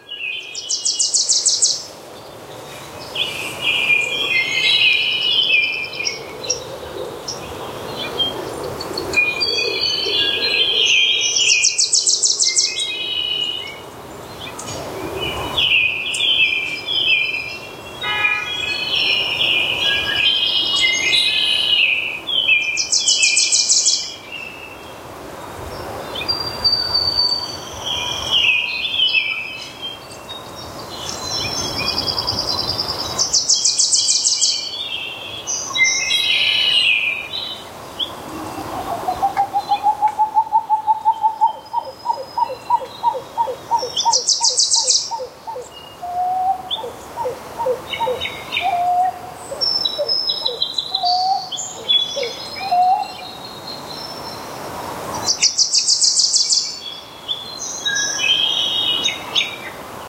pine lake SF may2017
Pine Lake San Francisco 5/2017
field-recording, spring, forest, birdsong, ambiance, nature, bird, ambience, ambient, birds